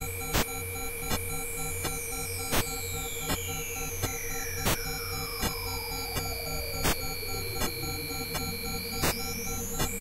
Sequences loops and melodic elements made with image synth.
loop, sound, space, sequence